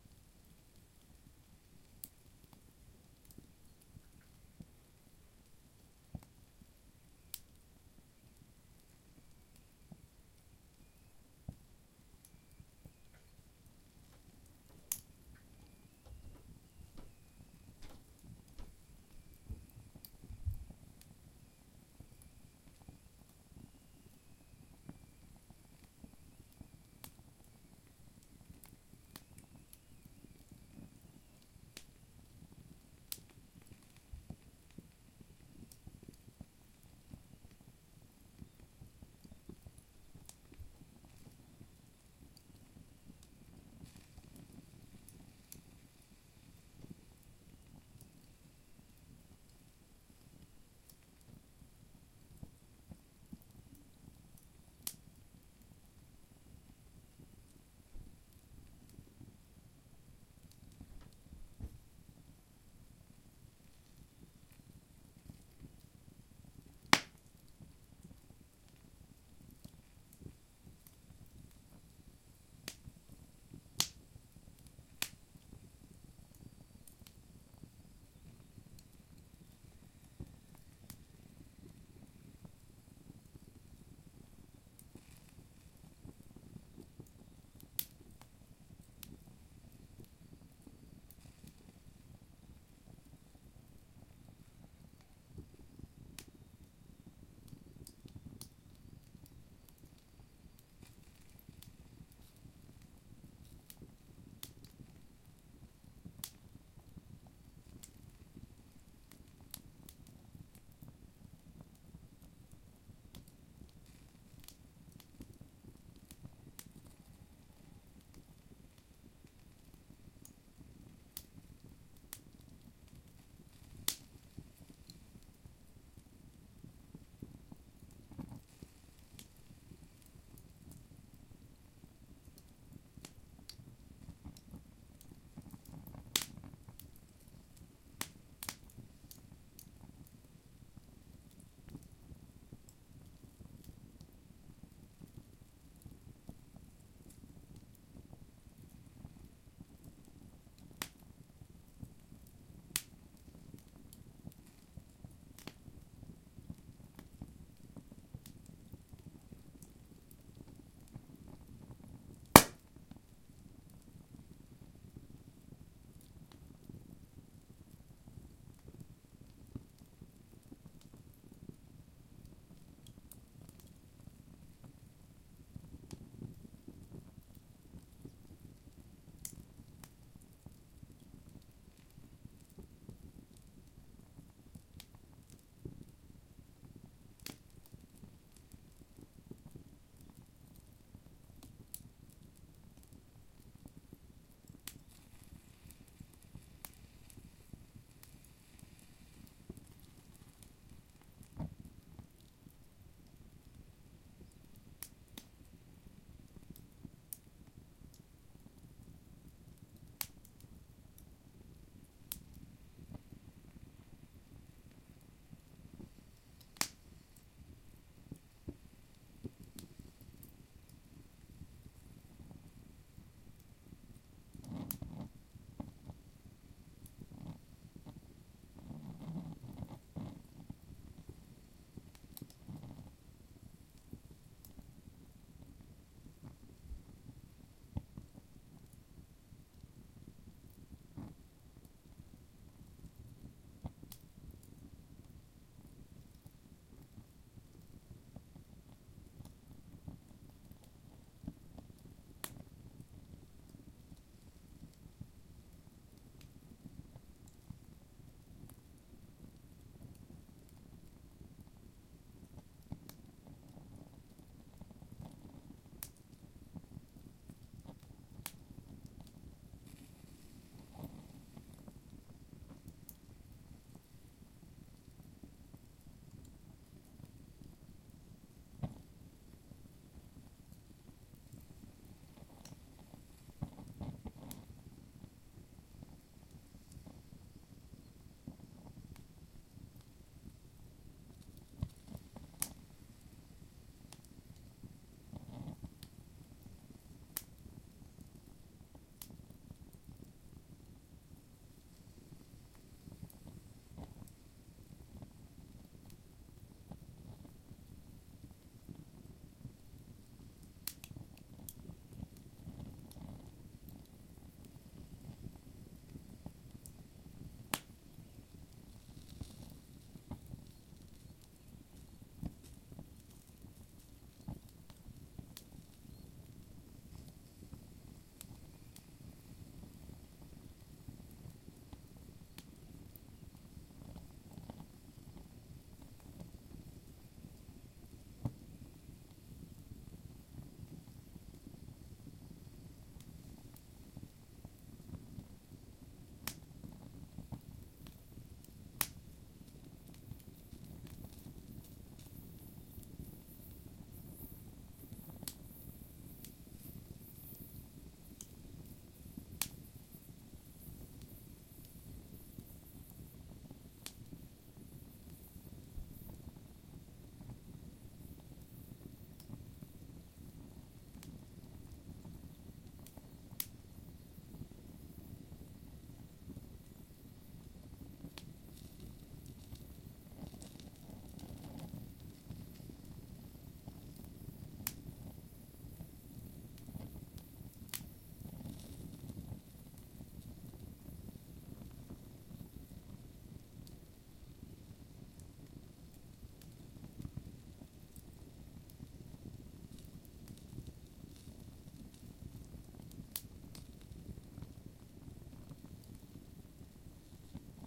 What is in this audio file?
Another recording of our fireplace, this time recorded with a Zoom H2, using the 90° stereo mode.